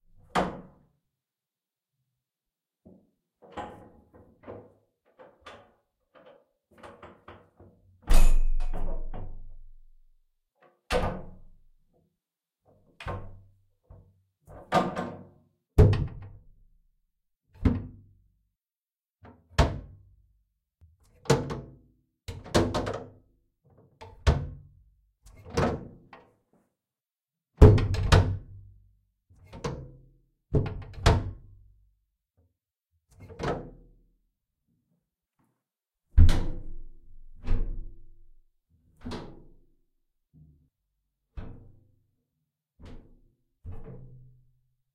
Heavy Bunker's Door
Several different openings, closings, bangs etc. Different recording positions in the file!
Mic: 2x Neumann KM184
Preamp: Millennia HV-3D
metal, open